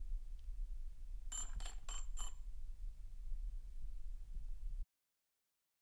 FXLM drone quadrocopter startup clicks close T01 ssg
Quadrocopter recorded in a TV studio. Sennheiser MKH416 into Zoom H6.
clicks, close, flying, launch, propeller, quadrocopter, ticks, warmup, xy